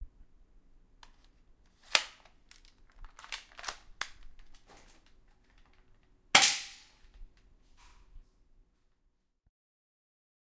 loading and shooting with an air gun